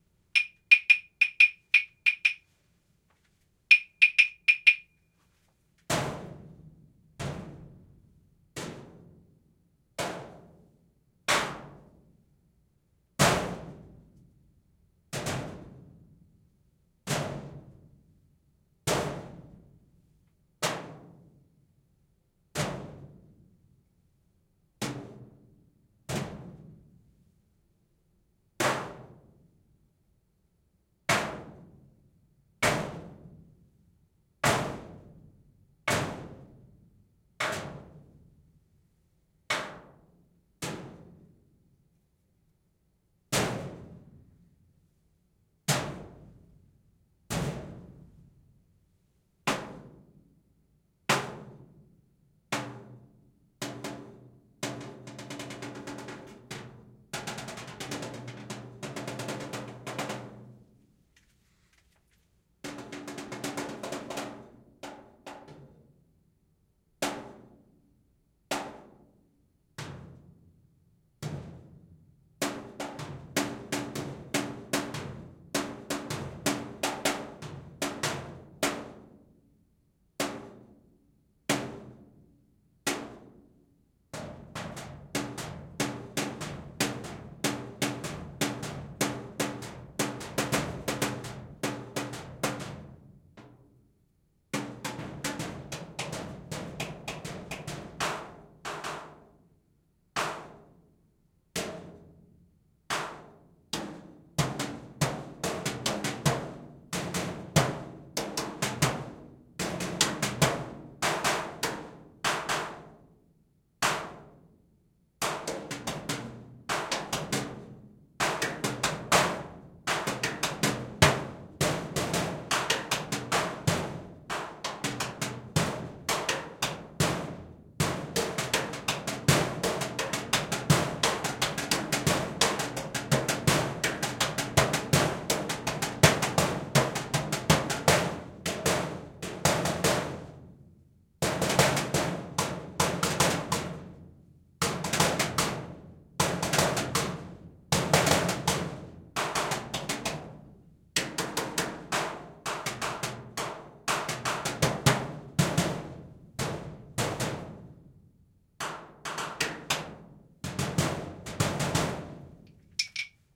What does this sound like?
Hitting a metal vent with claves.